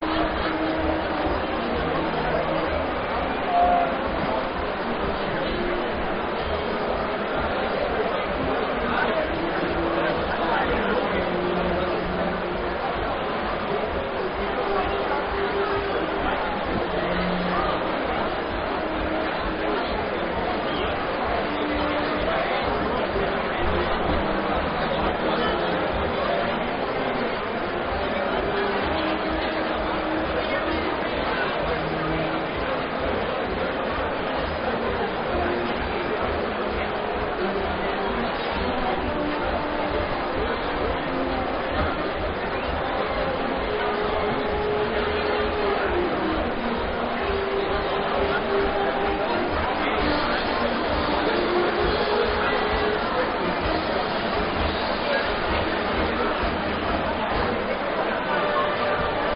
Inside a beer tent on the oktoberfest in munich on an afternoon in 2013.
Recorded with a Zoom H1 Handy Recorder.